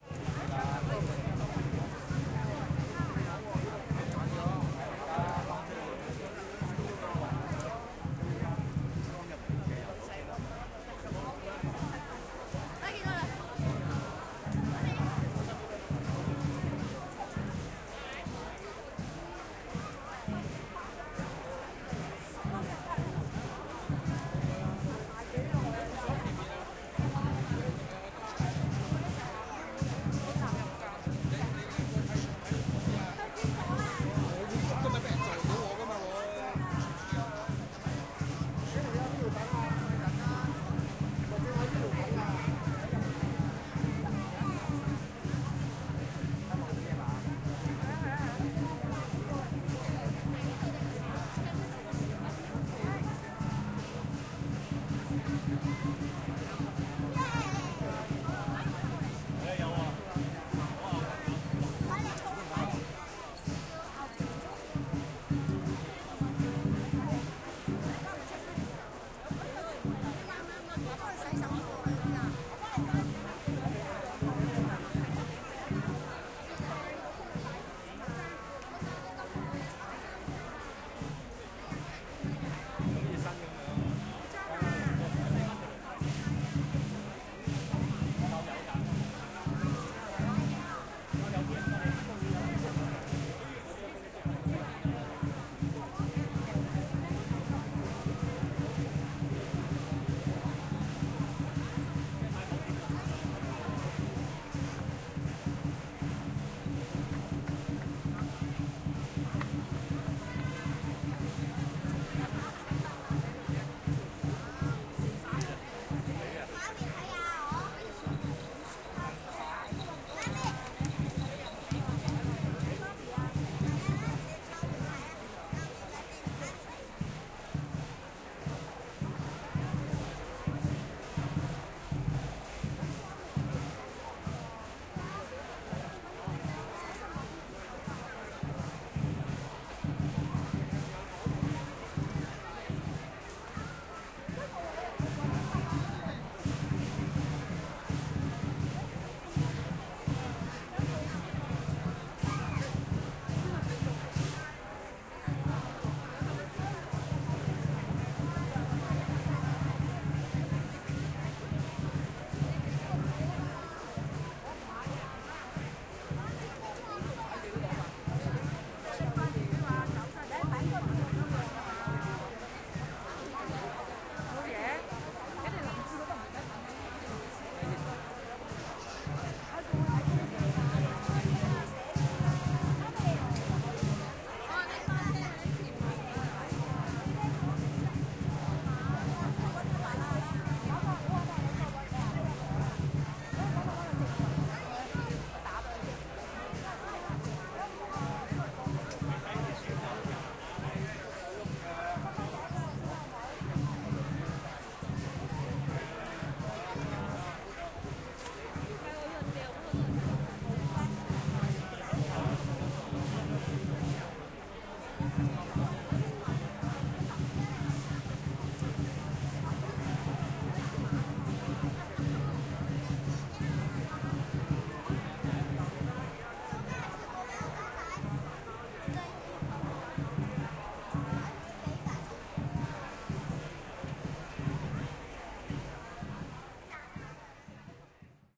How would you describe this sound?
LS 34256 HK WellWishing
At Well Wishing Festival, Lam Tsuen, Hong Kong. (Binaural, please use headset for 3D effect)
I recorded this binaural audio file during the 3rd day of the lunar calendar at Well Wishing Festival, held in Lam Tsuen, New Territories, Hong Kong.
Here, I’m standing in the middle of many people passing by while talking and chatting, and in the background, you can hear drummers following the dragon dancing.
Recorded in February 2019 with an Olympus LS-3 and Ohrwurm 3D binaural microphones.
Fade in/out and high pass filter at 60Hz -6dB/oct applied in Audacity.
ambience, field-recording, people, voices, religion, Chinese-New-year, religious, dragon-dance, binaural, Hong-Kong, festivities, atmosphere, crowd, dragon-dancing, Well-Wishing-Festival, Lam-Tsuen, soundscape